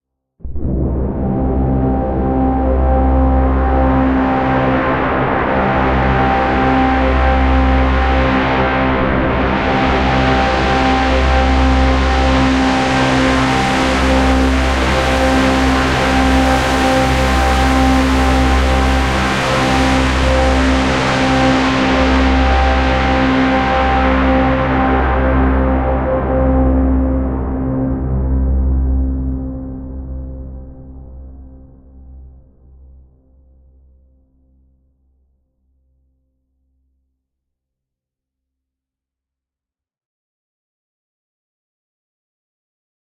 Cinematic Monster Drone in C
Heavily distorted monster drone in C, using a double bass and viola heavily processed in Cubase.